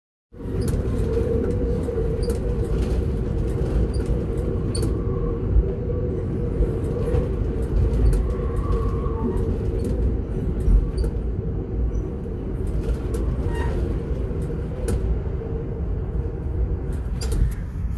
Recorded while on train going through tunnels up in a mountain pass